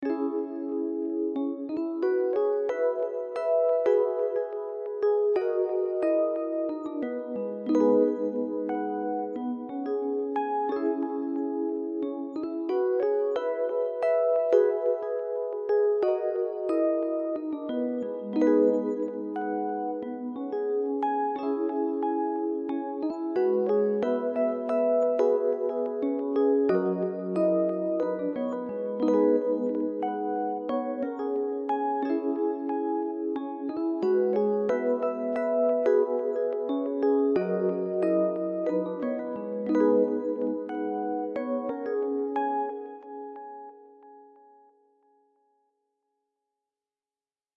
cola- epiano riff 6
Chords are C#m, Eadd9, D#m, G#m, 90 bpm.
Used this in my own song, with piano and slowed to 85 bpm.
Advanced, Bells, Chill, Chords, Easy-listening, Electric, E-Piano, Full, House, Lo-Fi, Piano, Preset, Progression, Riff, Soft, Tempo